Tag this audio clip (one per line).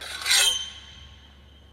sword-slash
slash
movie
slice
sword
foley